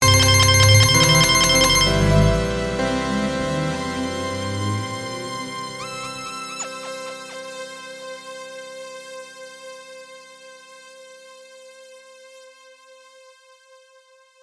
Regular Game Sounds 1
You may use these sounds freely if
you think they're usefull.
I made them in Nanostudio with the Eden's synths
mostly one instrument (the Eden) multiple notes some effect
(hall i believe) sometimes and here and then multi
intstruments.
(they are very easy to make in nanostudio (=Freeware!))
I edited the mixdown afterwards with oceanaudio,
used a normalise effect for maximum DB.
If you want to use them for any production or whatever
20-02-2014
effect, game, sound